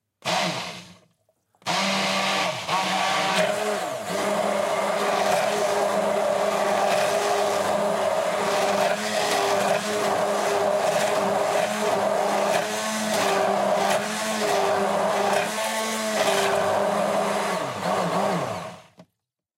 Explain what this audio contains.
Beater recorded with AT2050 mic